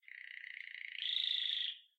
my canary doing something like a trilling effect
call, chirp, canary, tweet, trill